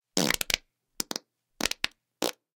The source was captured with the extremely rare and expensive Josephson C720 microphone (one of only twenty ever made) through Amek preamplification and into Pro Tools. Final edits were performed in Cool Edit Pro. We reckon we're the first people in the world to have used this priceless microphone for such an ignoble purpose! Recorded on 3rd December 2010 by Brady Leduc at Pulsworks Audio Arts.
amek, bathroom, bottom, bowel, breaking, brew, brewing, c720, embouchure, fart, farting, farts, flatulate, flatulation, flatulence, flatus, gas, josephson, noise, passing, rectal, rectum, trump, wind